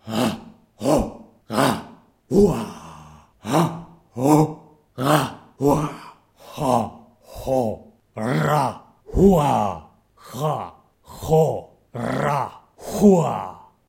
Tribal Battle Chants/Shouts
Recorded with a Zoom H2.